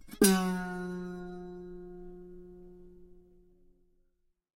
toy guitar 1
A pack of some funny sounds I got with an old toy guitar that I found in the office :) Hope this is useful for someone.
Gear: toy guitar, Behringer B1, cheap stand, Presonus TubePRE, M-Audio Audiophile delta 2496.
cartoon, guitar, string, toy, toy-guitar